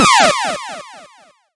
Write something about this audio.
Magic Spell 06
A spell has been cast!
This sound can for example be used in role-playing games, for example when the player plays as Necromancer and casts a spell upon an enemy - you name it!
If you enjoyed the sound, please STAR, COMMENT, SPREAD THE WORD!🗣 It really helps!
spell, magic, shaman, sorcerer, angel, dark, wizard, rpg, necromancer, fantasy, game, bright, mage